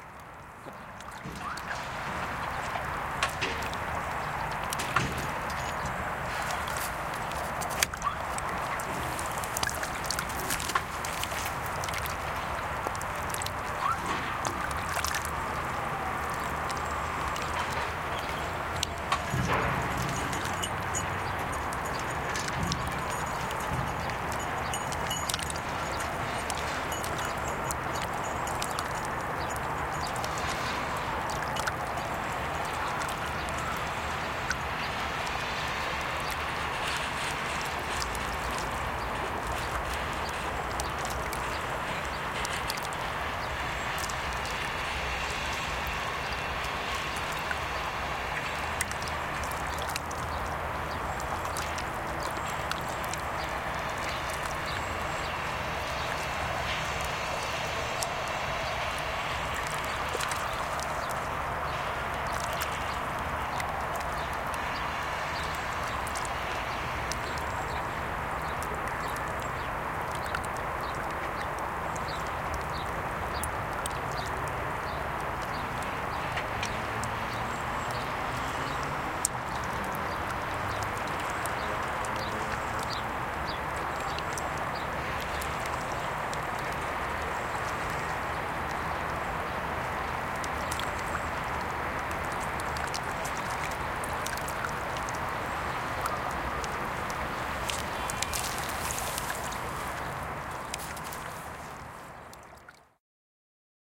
Wisła brzeg Roboty RF
just some ambience of Warsaw's river close saturday morning, some construction works ,recorded with edirol R09 and binaural mics.
river, recording, field, atmophere, Wis, a